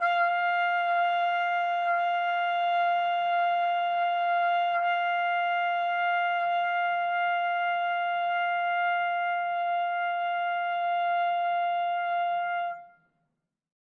One-shot from Versilian Studios Chamber Orchestra 2: Community Edition sampling project.
Instrument family: Brass
Instrument: Trumpet
Articulation: sustain
Note: E#5
Midi note: 77
Midi velocity (center): 31
Room type: Large Auditorium
Microphone: 2x Rode NT1-A spaced pair, mixed close mics
Performer: Sam Hebert